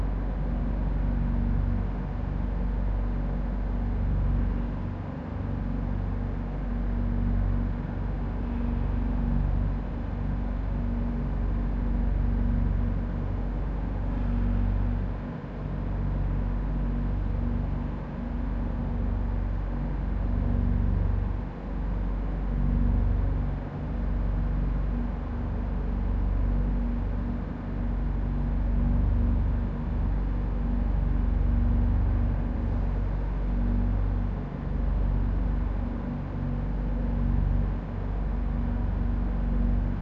Metal Fan
Microwave with FX Chain
wind, soundscape, Metal, field-recording